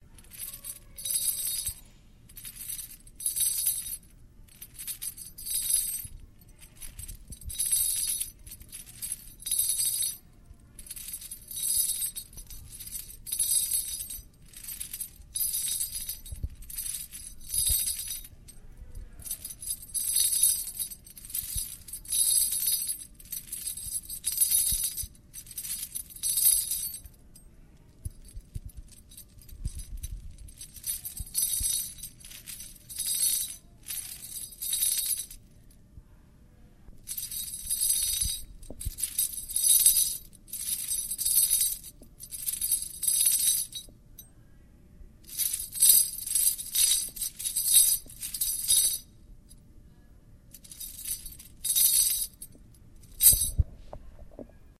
Real sound recording of raising and lowering a metal chain onto concrete. Speed of movement varies.